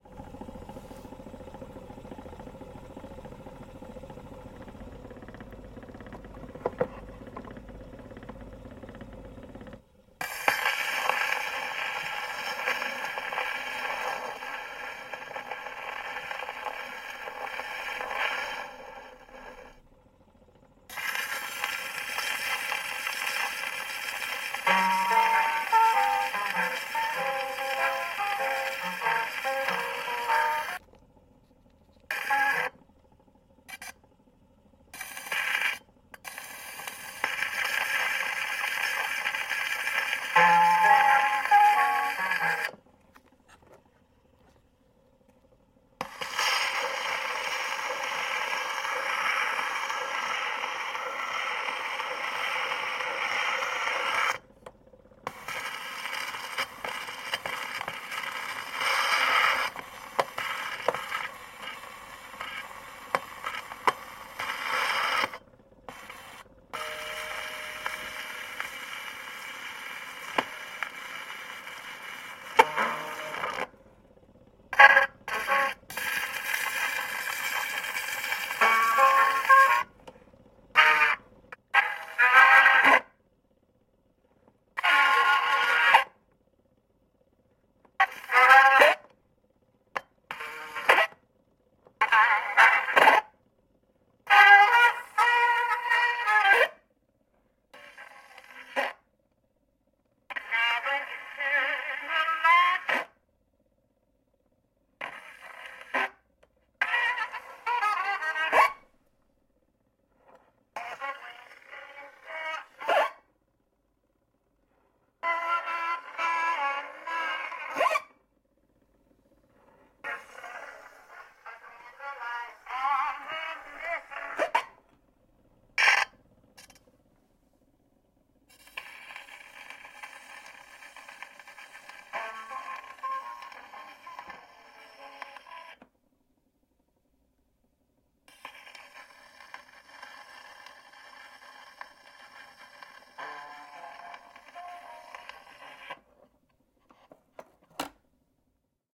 Gramophone, old record player, motor noise, surface static, start stop scratches, pops (2012)
Old, portable gramophone player, motor noise, surface static, various scratches on a 78 record. Sony M10. 2012.
surface-noise, record, gramophone, phonograph, scratch